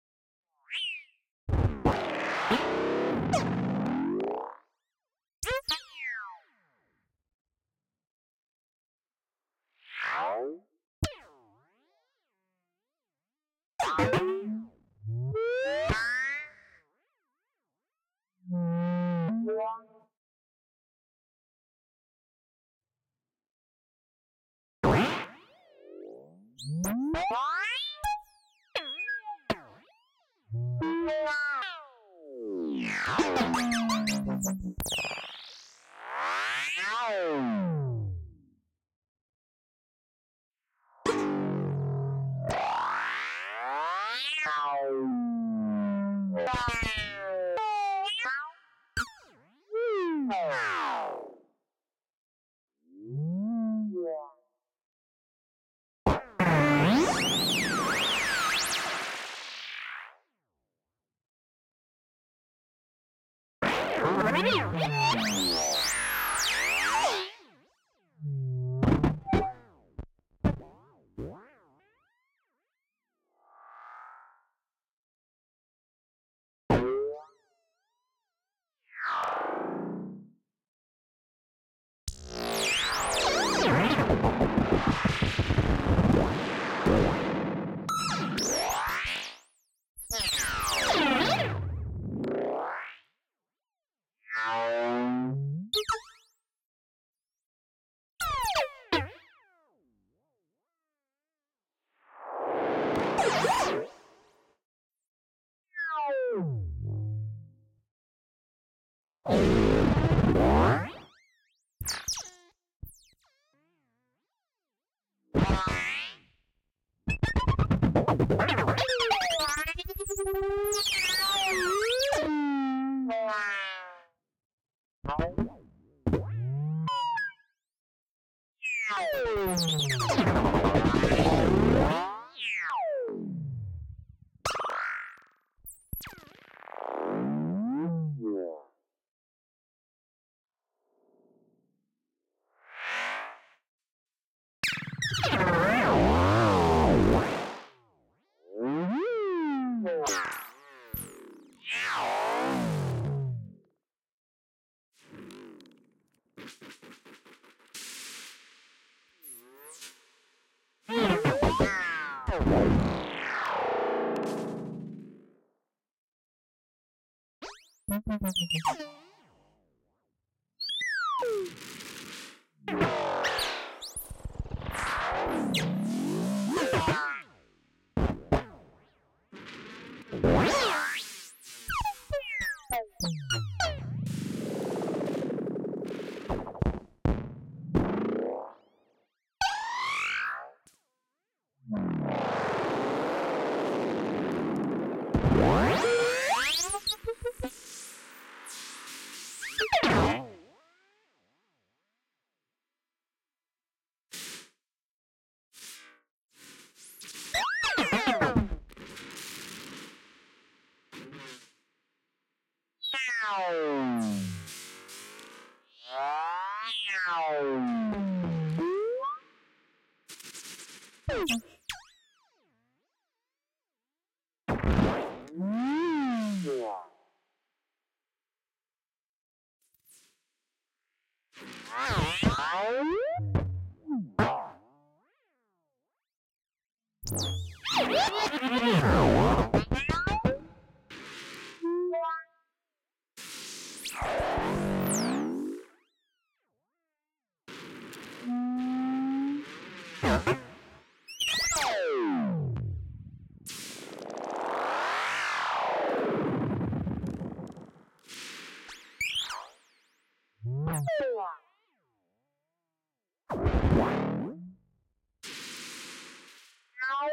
Synthesized alien / animal / robot / droid sounds 2
Reaper's parameter modulation used on Aalto. Plenty of material to cut up and use for whatever.